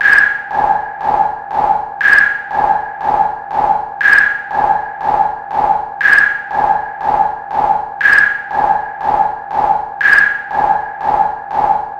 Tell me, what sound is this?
un son en echo, click truck